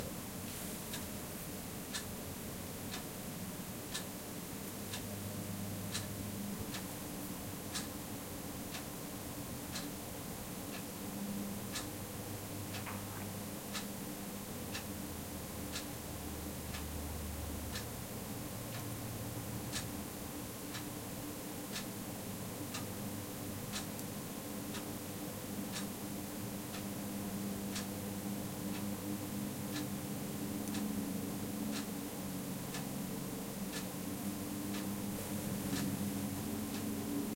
Room Tone With Ticking Clock
A quick recording for anyone who needs a ticking sound.
h5, free, edited, stereo, foley, zoom, sound, quality, high, zoom-h5, denoised, sample